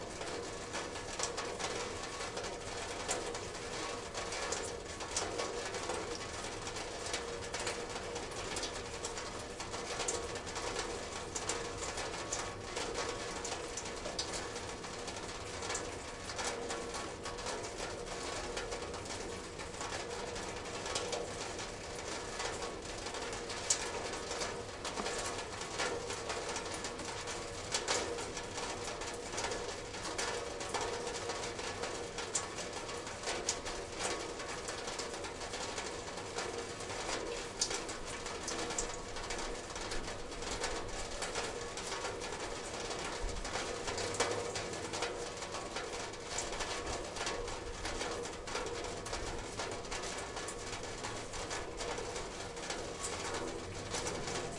Rain falling around and through the downspout of a rain gutter in the courtyard of the apartment building where I stayed in St. Petersburg. There were 3 or 4 downspouts from which I made a total of 7 recordings. September 3, 2012, around 4 PM. Recorded with a Zoom H2.